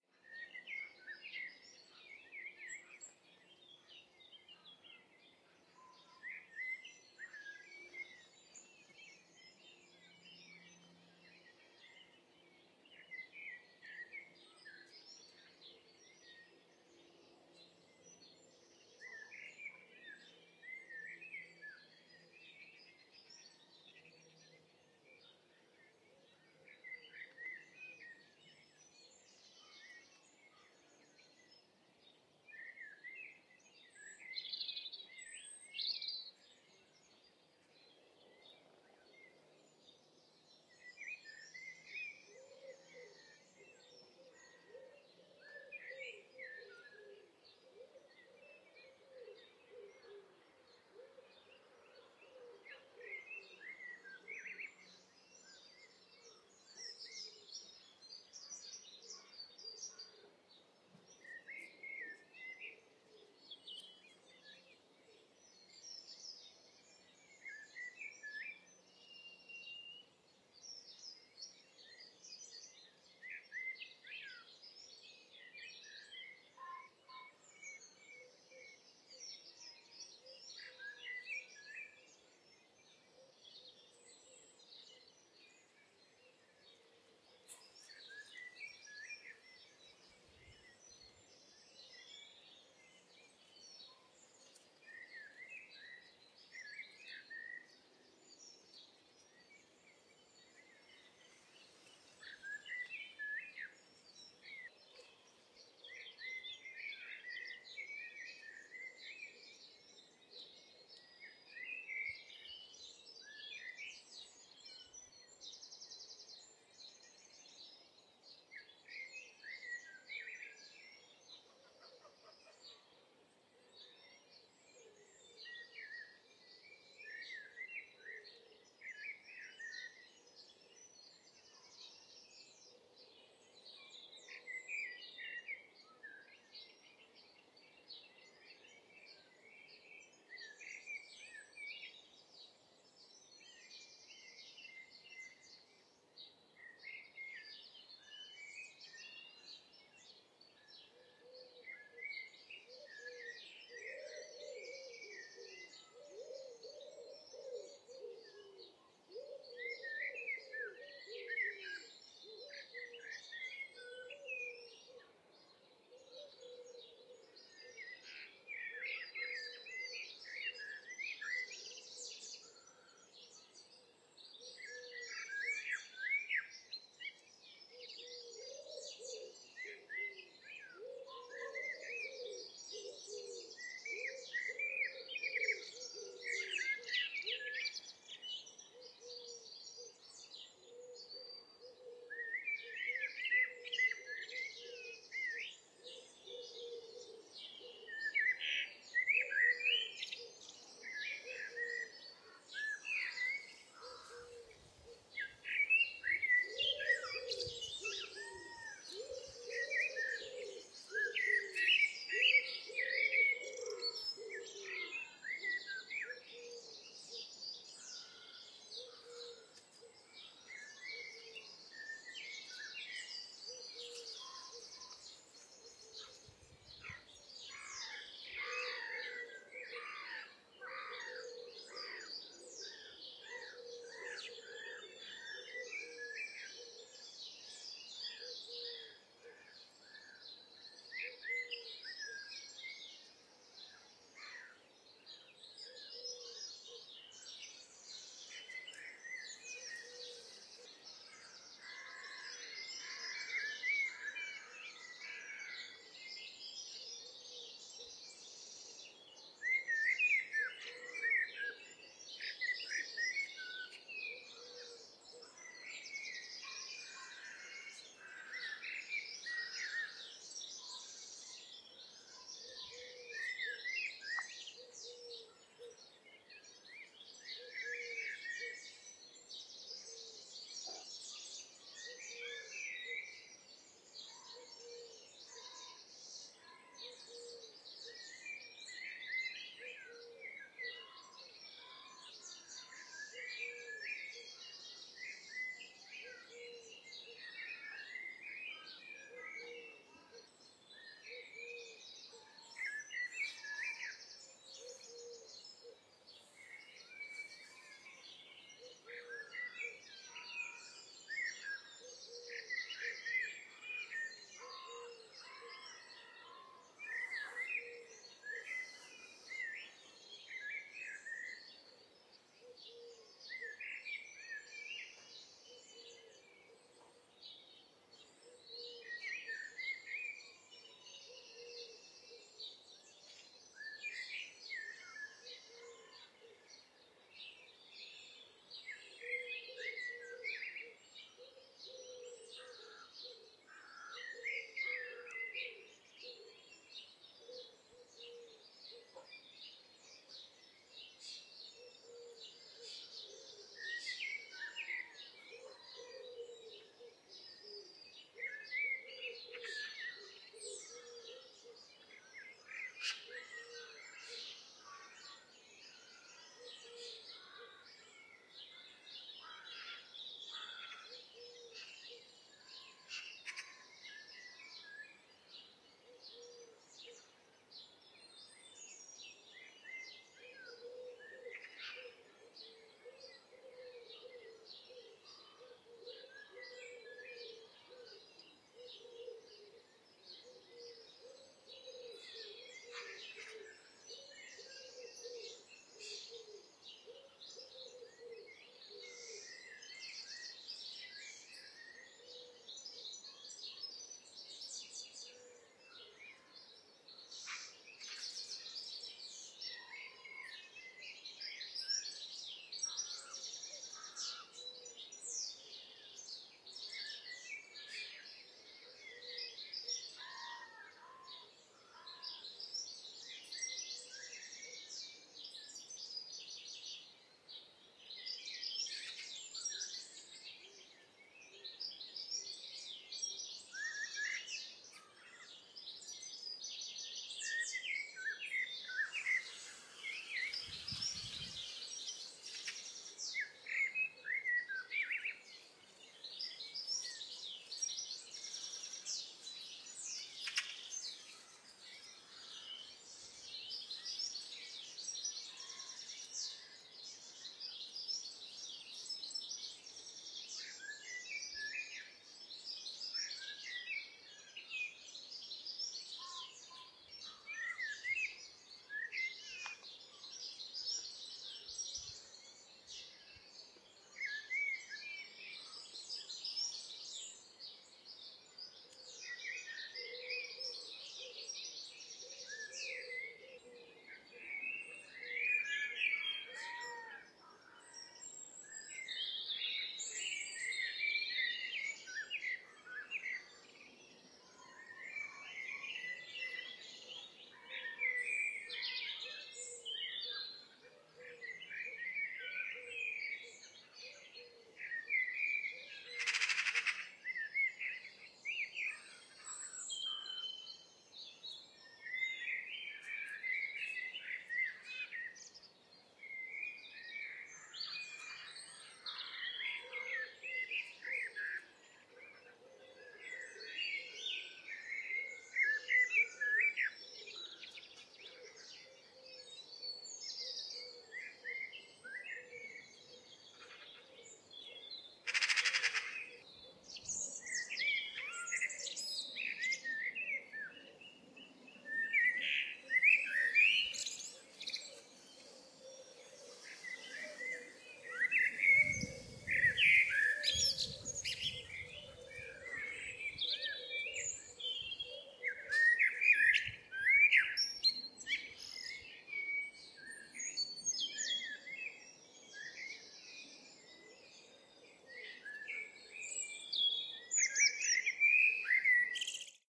Garden Soundscape
Recorded from about 0515 in the morning. This is in a suburban garden in Essex, UK. A blackbird can be heard through most of the recording. Other birds that can be heard are pheasant, robin, woodpigeon, wren, collared dove, peacock (not native but this bird is living wild in the area), carrion crow, black headed gull, starling, magpie and goldfinch.
The recording was made with a Sennheiser K6/ME66 microphone on a tripod attached to a Zoom H5. There has been some noise reduction and editing with Audacity. The editing was mainly to reduce the worst of the aircraft noise. There is still some aircraft and other human-related noise as well as some wind occasionally.
ambient, garden-soundscape, birdsong